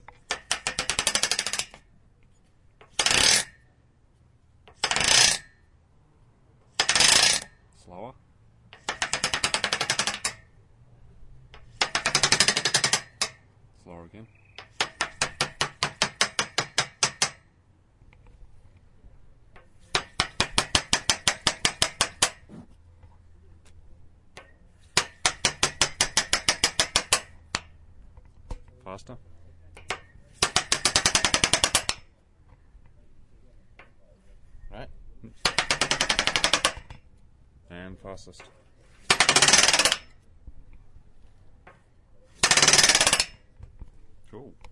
industrial steelframe wood tapping
wood being scraped along a metal frame, varying speeds.